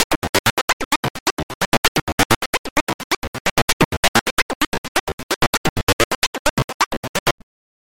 Arpio5 Synth Arpeggiator